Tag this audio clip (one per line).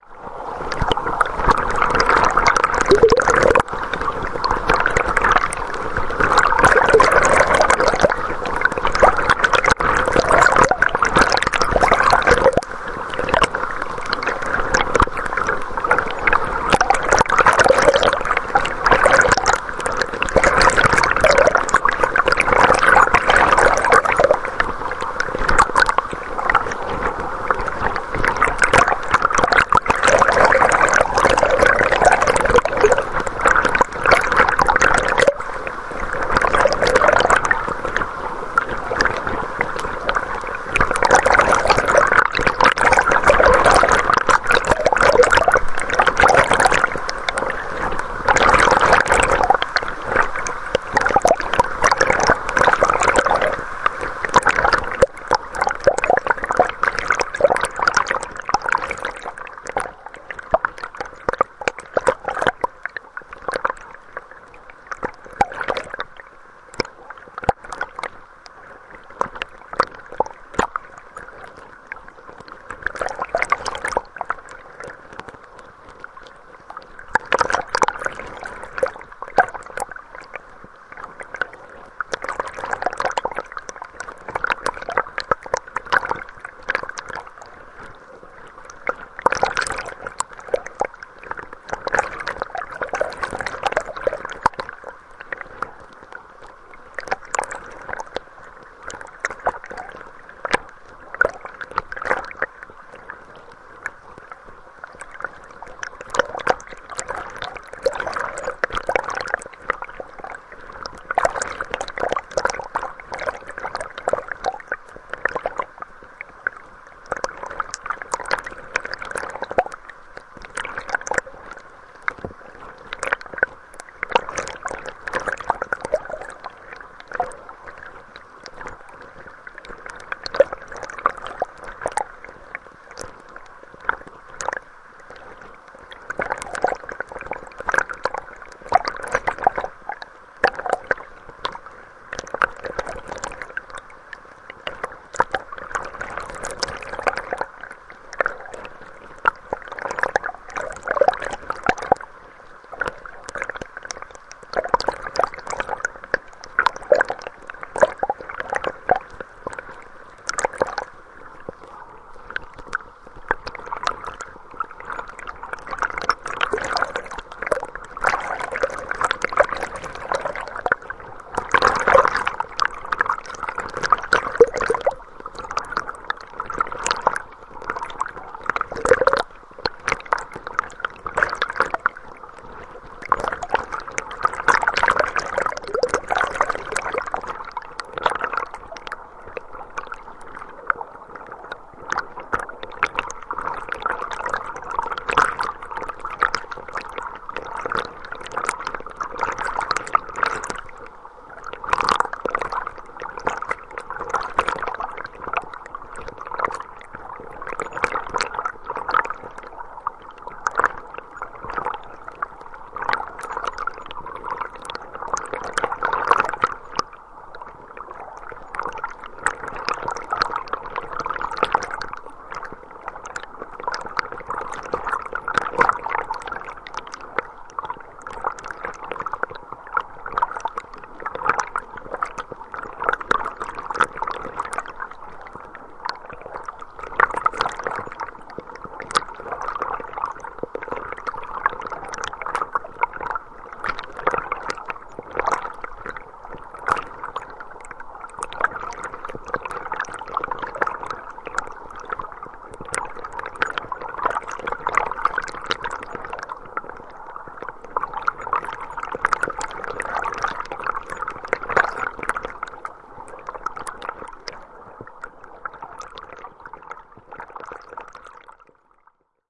brasil
stream
rio-acima
birds
field-recording
river
cachoeiras
forest
nature
bubble
brazil
morning
belo-horizonte
rural
waterfall
bird
submerged
hydrophone
water
countryside
liquid
tangara
minas-gerais
aquatic
bubbles
underwater